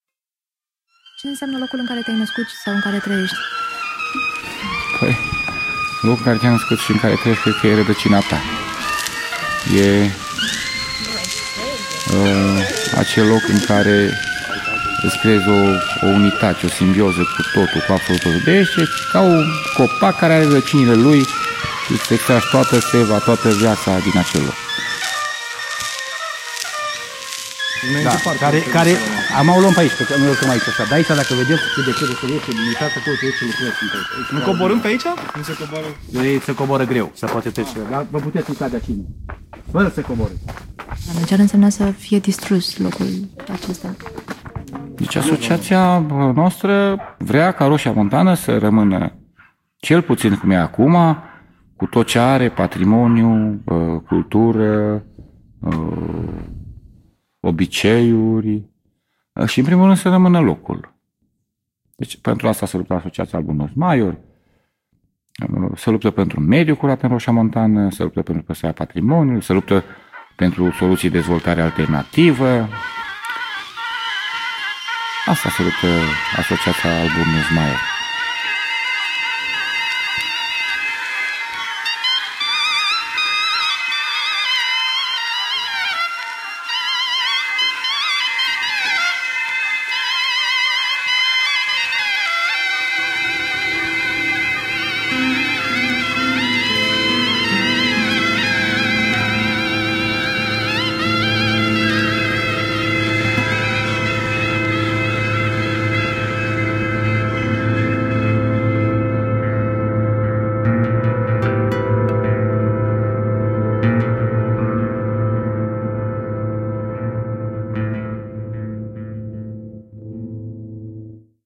An audio incursion to the Rosia Montana gold mine where visitors are presented the galleries and at the same time an on the run interview is conducted. Sound work by Maria Balabas.